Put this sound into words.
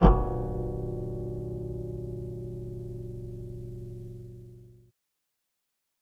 cello bell 3
Violoncello SFX Recorded
Cello, Bell, Violoncello, Hit, Cluster